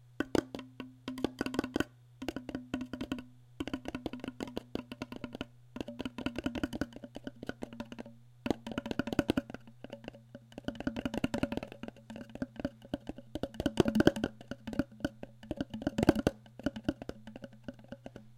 Quiet tapping on a small wooden and hide drum
tap, drops, dripping, percussion, drum, raindrops, rain